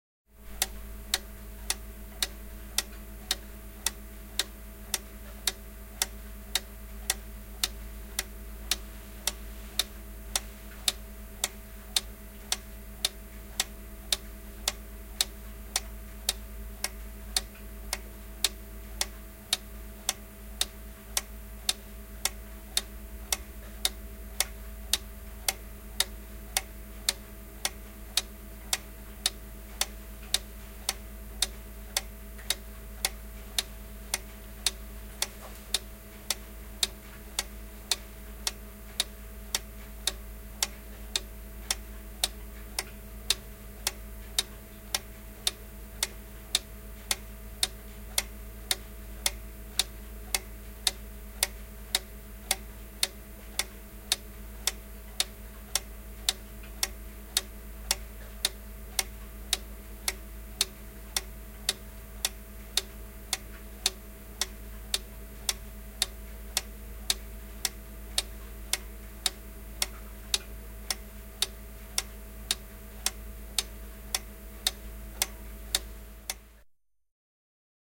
Seinäkello, tikitys, käynti / Clock on the wall ticking steadily (Schmeckenbecher)
Kello käy tasaisesti. (Schmeckenbecher)
Paikka/Place: Suomi / Finland / Nummela
Aika/Date: 23.05.1992